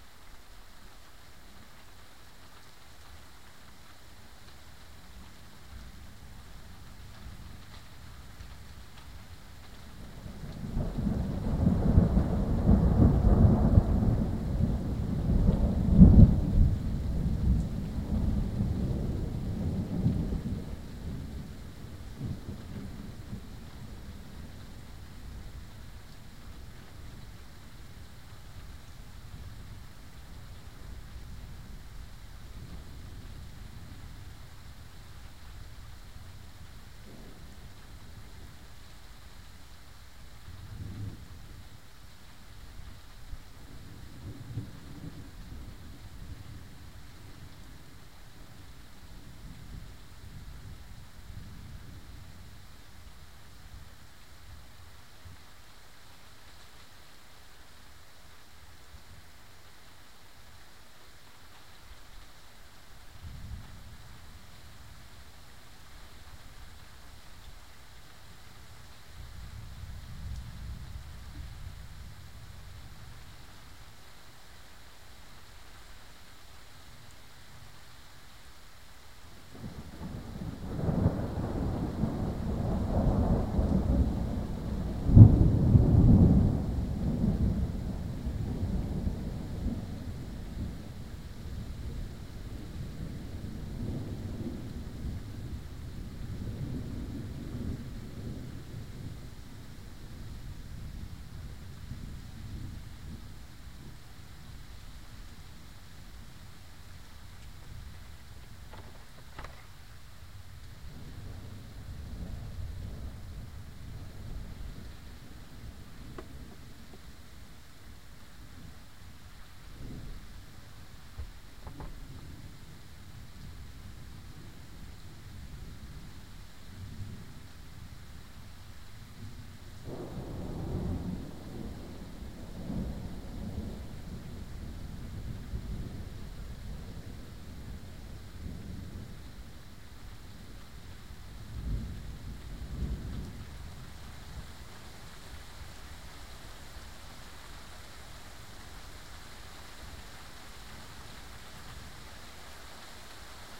These two distant thunderbolts were recording by an MP3 player in a severe thunderstorm on 18th of July, 2009, in Pécel (near Budapest, Hungary).
field-recording, lightning, thunderstorm, weather